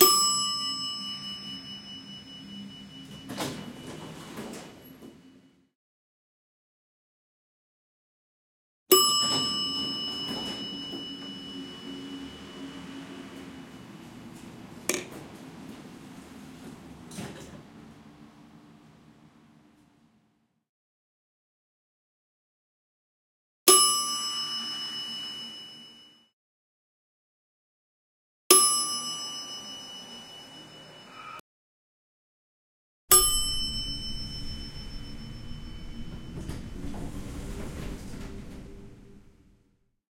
elevator bell dings closeup nice
bell, close, dings, elevator